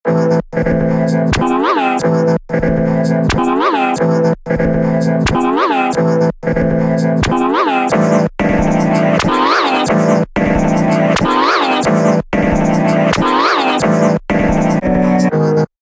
Funny Talk
beyond, space, voice